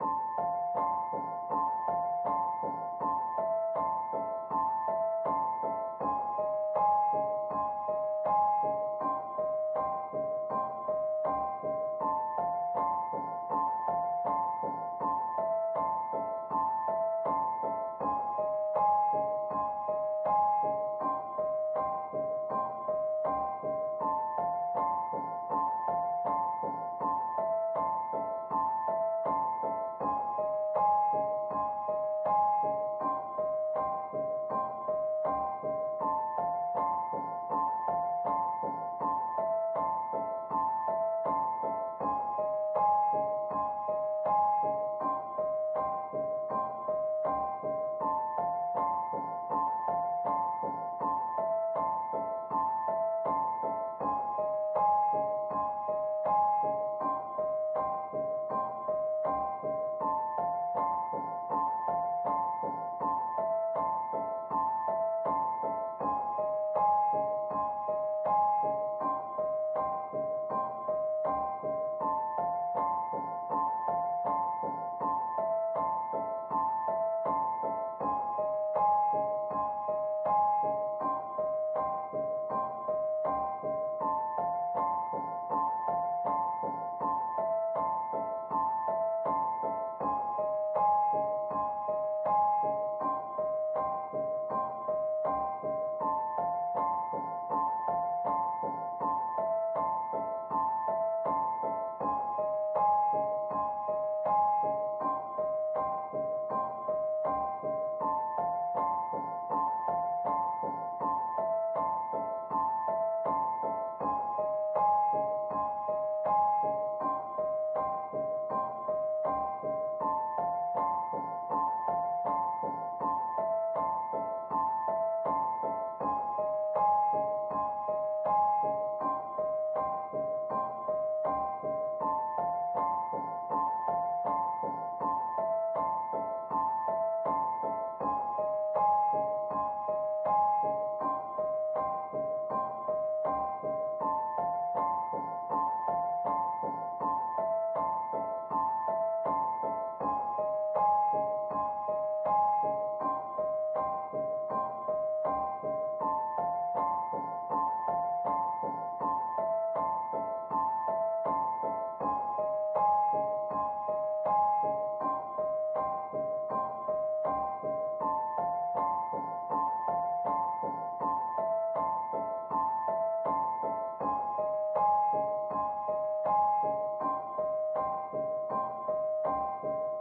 Dark loops 014 piano 80 bpm
80, 80bpm, bass, bpm, dark, loop, loops, piano